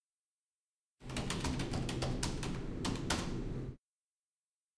This sound shows the keyboard noise when someone is typing some text. Furthermore, there is a background noise corresponding to the computers' fans.
It was recorded in a computers' room in Tallers building in Campus Poblenou, UPF.
UPF-CS14, Keyboard, PC, Typing, campus-upf, Computer, Office, Tallers